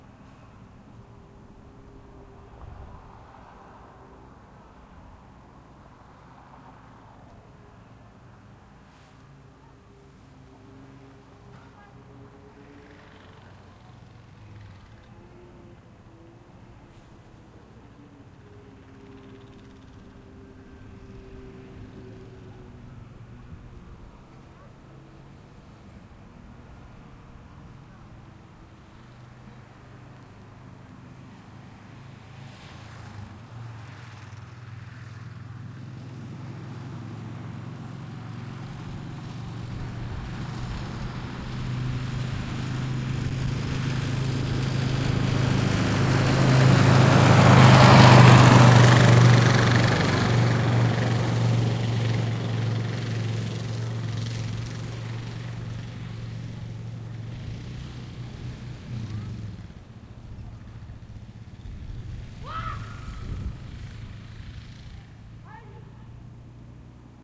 snowmobile approach from far distant pull up pass medium speed
snowmobile, approach, up, pass, distant, pull, speed, medium, far